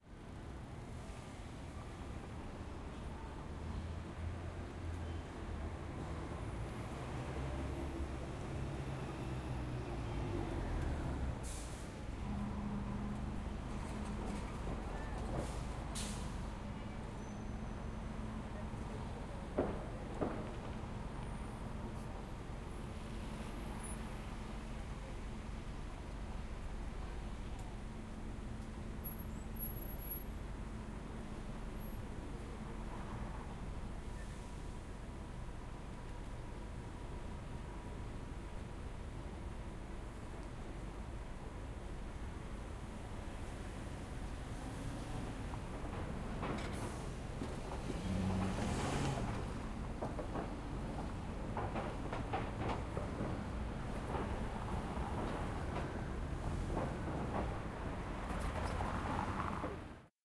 LA Streets 6th and Broadway Morning 3-EDIT
Recorded in Los Angeles, Fall 2019.
Light traffic. Metal plates at intersection. Buses.
Los-Angeles, City, Street, traffic, AudioDramaHub, field-recording